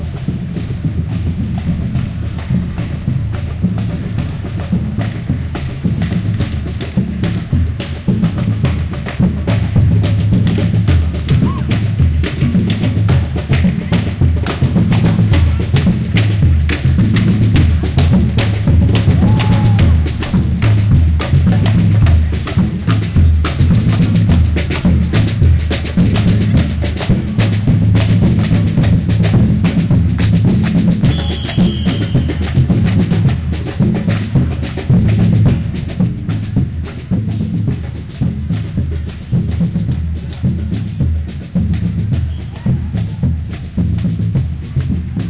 a group of drummers in a street performance in Plaza Nueva, Seville, Spain. Equalized this sample to enhance sound
ambiance, binaural, christmas, city, drums, field-recording, percussion, spain, street, winter